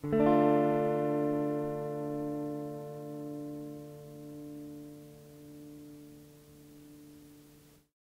Tape El Guitar 8
Lo-fi tape samples at your disposal.
collab-2, el, guitar, Jordan-Mills, lo-fi, lofi, mojomills, tape, vintage